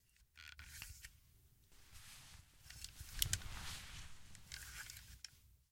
Moving a gun around in a hand.
foley, gun, hand, movement